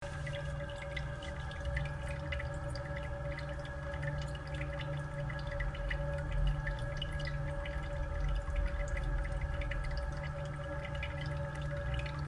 Dribbling water in drain. Weird pipe noise in the background which adds an eery vibe
water, pipe, Dribbling, toilet